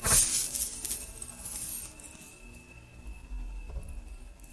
This is the sound of Alex and Ani bracelets being jingled and shaking on someone wrist. This sound has been tampered with minor tempo changes.